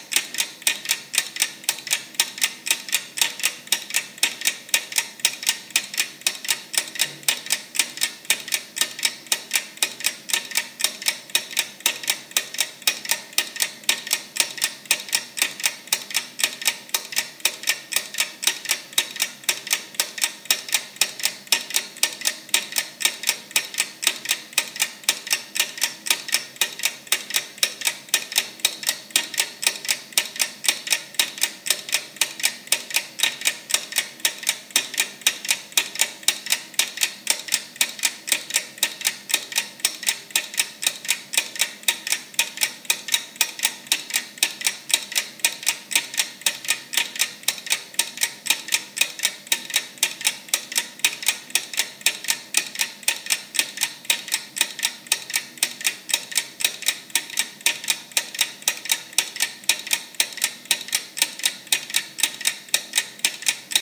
20090405.clock.mono.reverb
clock ticking. Sennheiser MKH 60 into Shure FP24 preamp, Edirol R09 recorder
clock, machine, tic, time, timer